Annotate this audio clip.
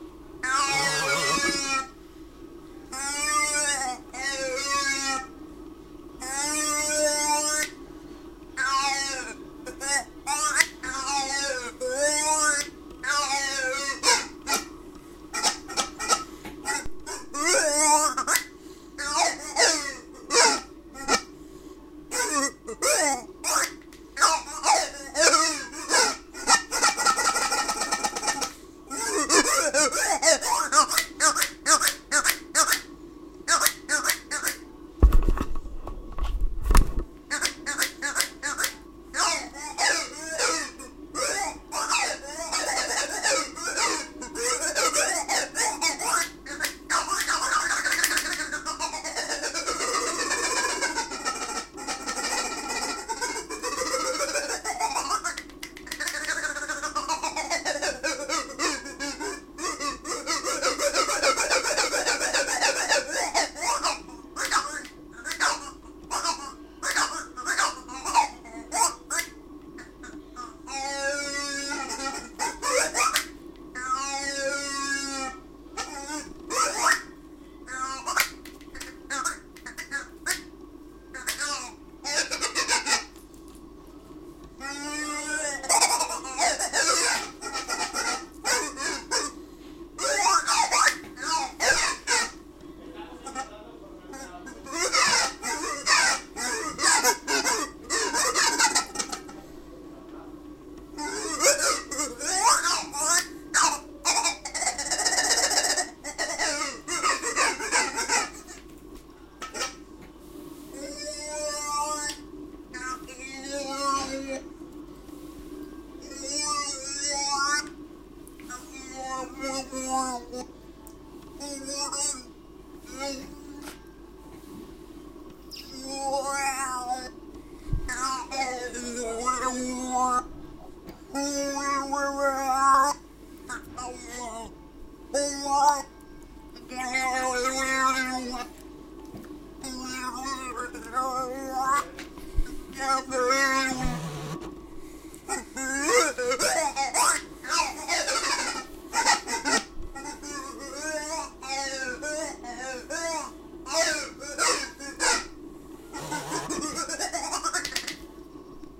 This is a recording of one of those long toys that has something sliding around inside. When you turn the bar over the slider goes down and makes a weird squeaking sound. Mono.